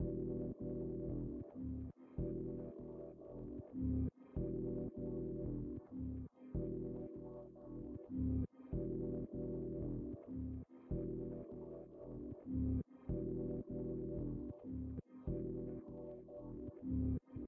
It was made in Absynth 5 and is a basic Pad. The Tempo is at 110 bpm. Made in Bitwig Studio. I plan a Hip Hop Project with the Escape Pad.